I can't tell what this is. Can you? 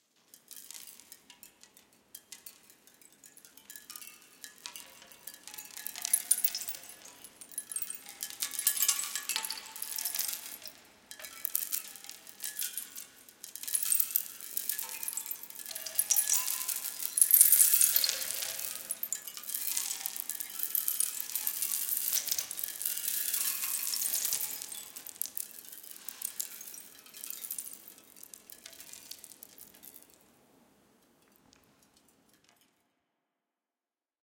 Dropped, crushed egg shells. Processed with a little reverb and delay. Very low levels!
crackle, crush, drop, eggshell, splinter